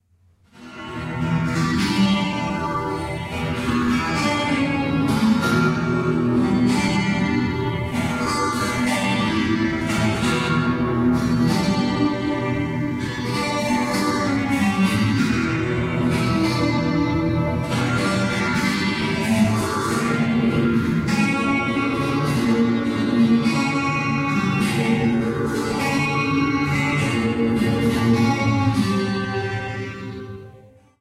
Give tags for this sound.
paulstretch; Strings; ambiant; acoustics; Guitar

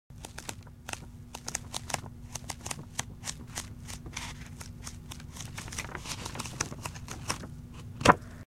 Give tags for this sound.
flip; flipping

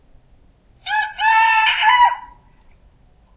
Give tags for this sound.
cock; field-recording; rooster

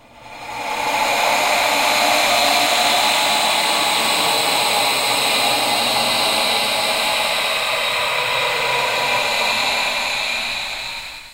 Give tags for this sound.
fright; horror; sinister; creepy; strange; spooky; phantom; weird; scary; noise; ghost; haunted

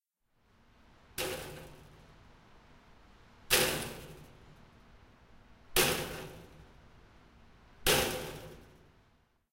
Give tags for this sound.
aip09; banging; lattice; metal-frame; stacks; stairs; stanford; stanford-university; strike

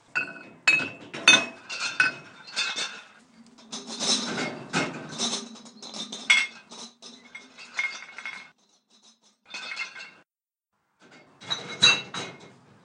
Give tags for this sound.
morning; clatter; bottle; milkman; bottles; jar; jars; glass

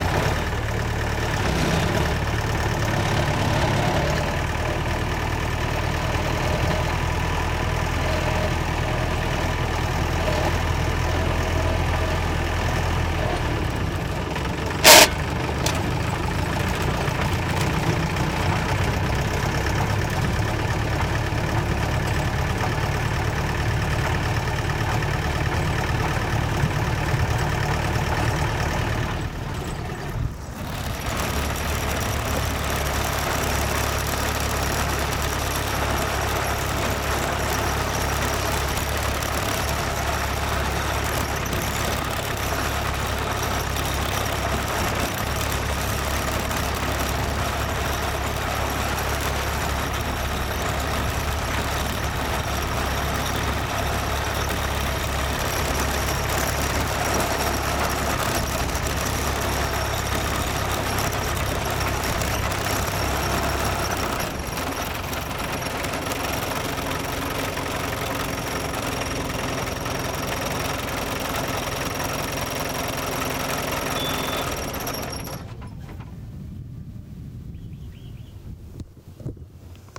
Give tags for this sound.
Field; H6; recording; sound; Sync

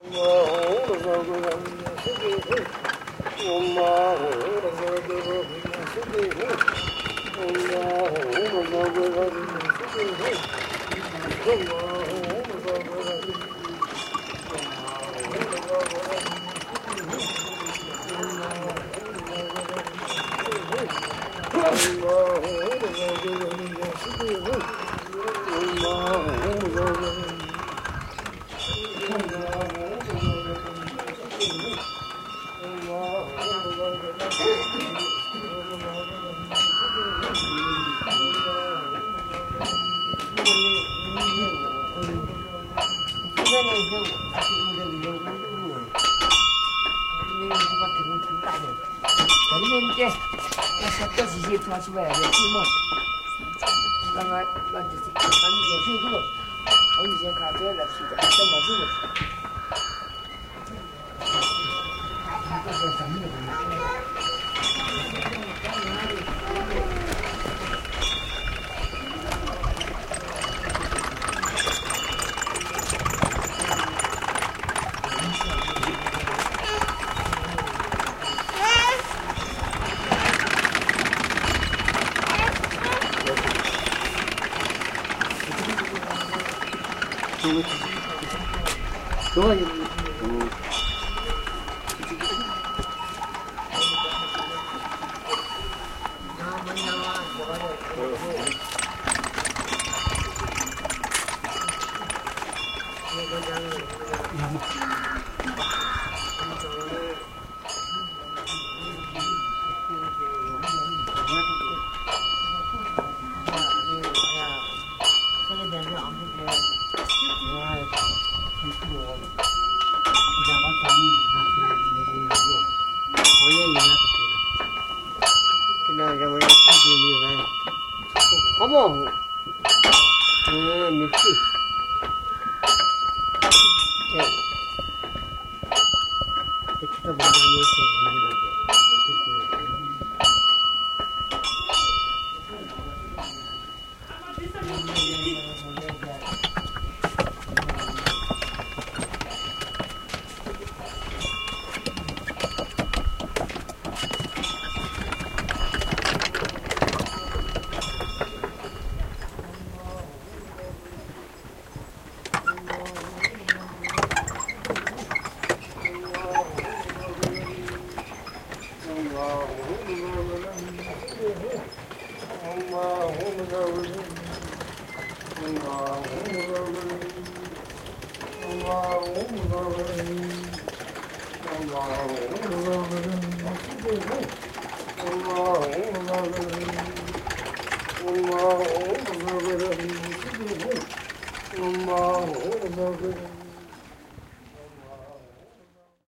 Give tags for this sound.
ancient; Asia; Bhutan; Bhutanese; Buddhism; Buddhist; chant; chanting; field-recording; holy; language; mantra; meditate; meditation; pray; prayer; religion; rotate; sound; spin; stupa; temple; wheel; worship

